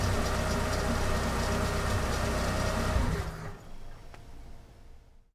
Car turning off
automobile, car, sounds